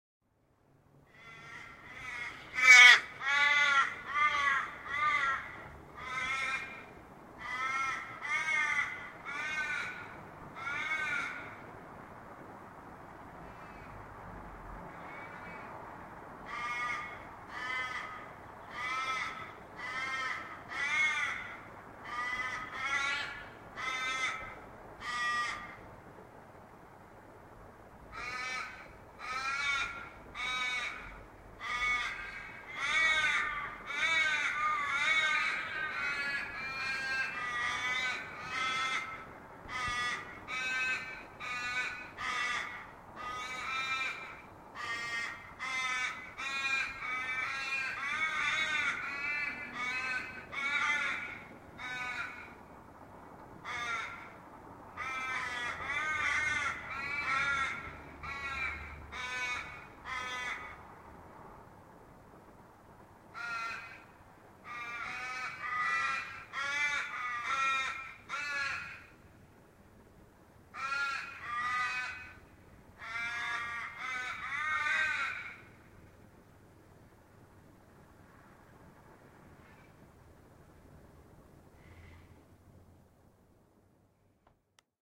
Australian Ravens defending their territory.
The Australian Raven (Corvus coronoides) is a passerine bird in the genus Corvus native to much of southern and north eastern Australia.
Measuring 46–53 centimetres (18–21 in) in length, it has all-black plumage, beak and mouth, as well as strong grey-black legs and feet. The upperparts are glossy, with a purple, blue, or green sheen, and it's black feathers have grey bases.
The Australian Raven is distinguished from the Australian Crow species by its throat hackles, which are prominent in adult birds.
Older adult individuals have white irises, while younger birds have dark brown irises.
Australia, Birds, Crow